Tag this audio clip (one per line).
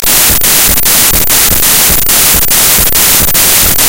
this
what
all
dont
know